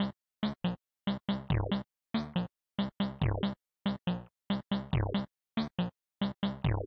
alteredstates funkgoa 1 140bpm
Great for any psy/goa track
Funky, Loop, Psychedelic, Trance